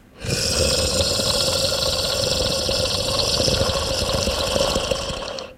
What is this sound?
growl beast
fear, growl